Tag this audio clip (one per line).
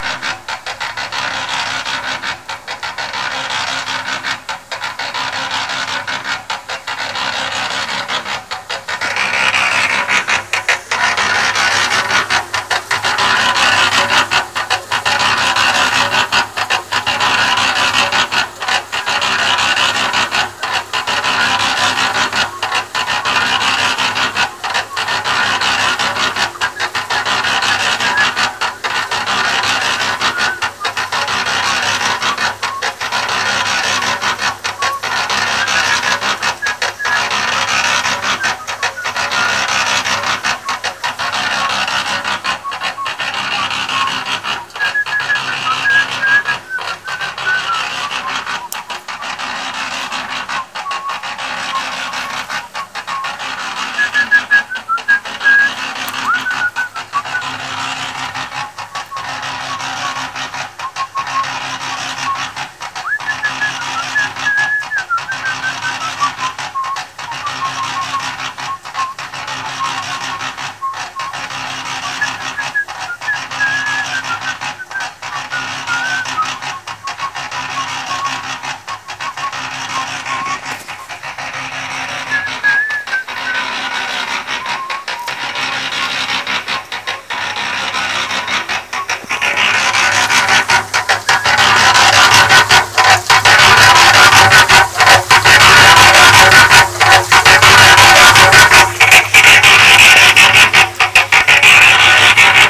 concrete
mixer-electricmachine-man
work